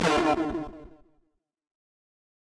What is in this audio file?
target complete
Fading-out square wave pewing. Created using SFXR